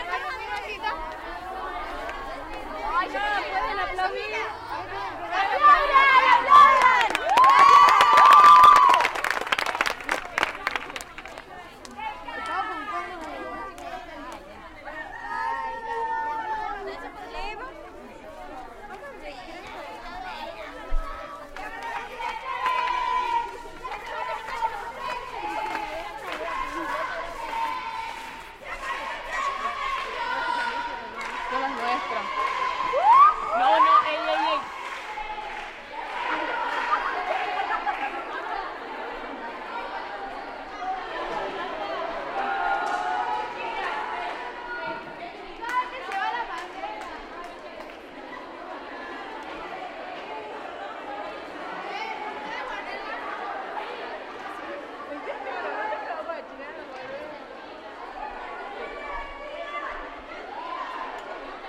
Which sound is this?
chicas aplauden
applause - clapping - plaudit - acclaim - bravo - round of applause - give a hand - public acclaim - rave review - standing ovation
a, acclaim, applause, bravo, clapping, give, hand, ovation, plaudit, public, rave, review, round, standing